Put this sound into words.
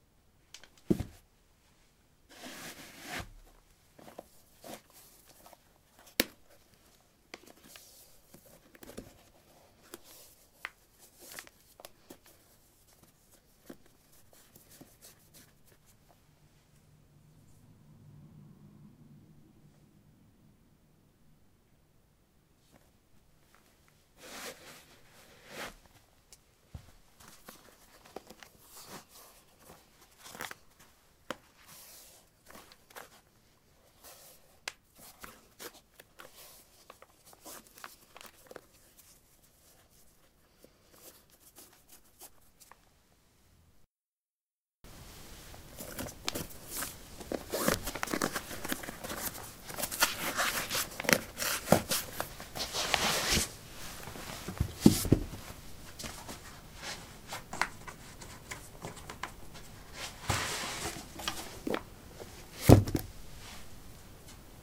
soil 16d trekkingshoes onoff
Taking trekking shoes on/off on soil. Recorded with a ZOOM H2 in a basement of a house: a wooden container placed on a carpet filled with soil. Normalized with Audacity.
footstep, steps, footsteps, step